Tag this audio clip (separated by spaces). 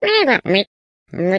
lizard cheer vocalisation computer vox game character